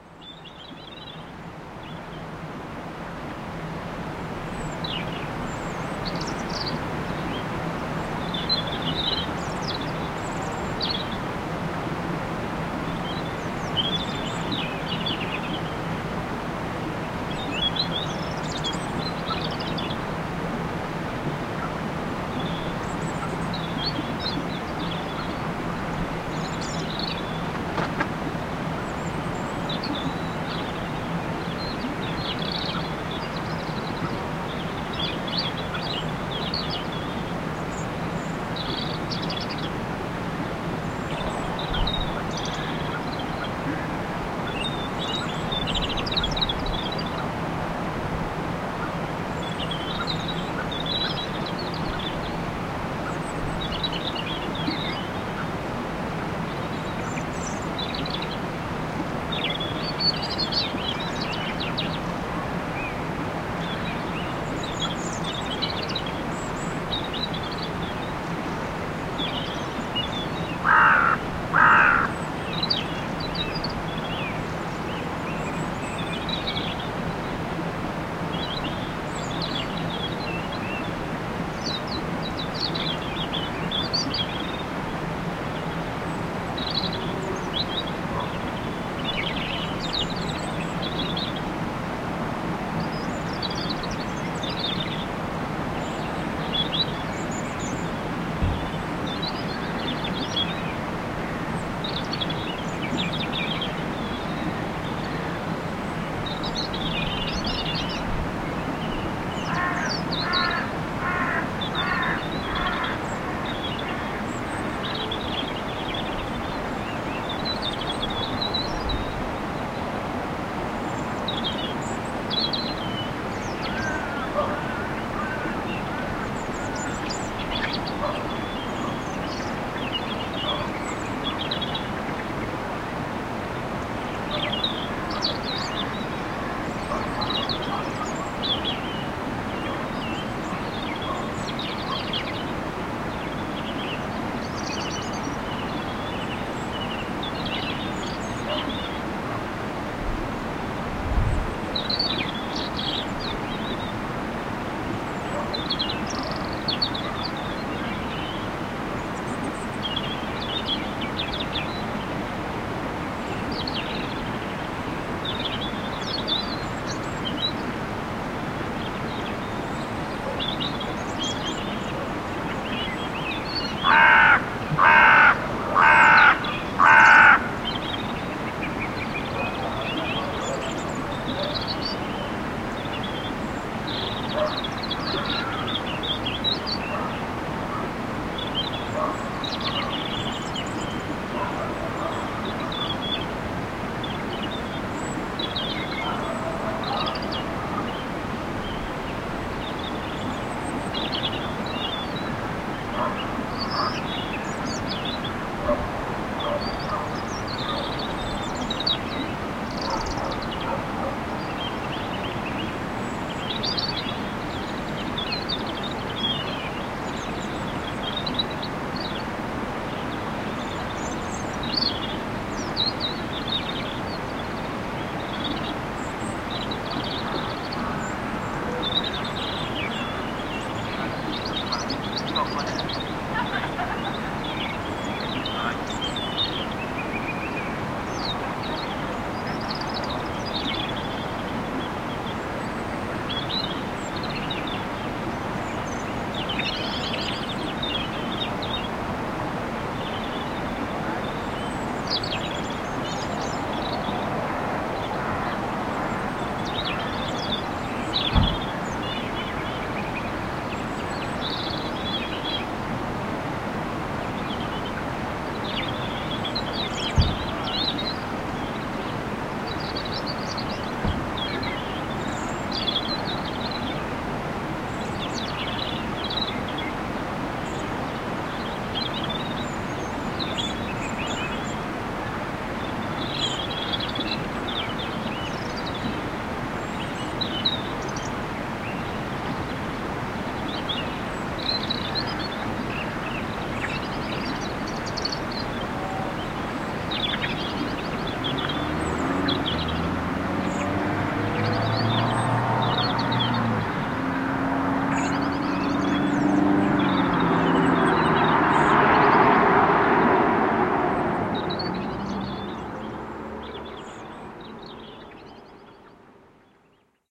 01.Morning-in-Staffin
Early morning in Staffin. We hear Robins and Blackbirds, crows and barking dog its voice echoes off the cliffs of Quiraing. Faint tricklin gof water in the boggy meadow. Distant voice of a conversation and people getting in a car at the end of the recording.